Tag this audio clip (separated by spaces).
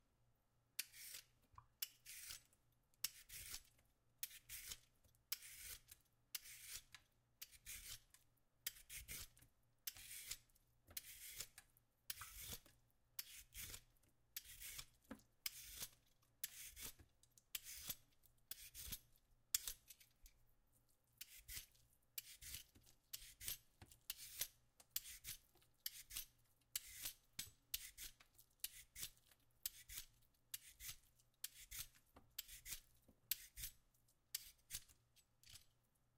Carrot Kitchen Potato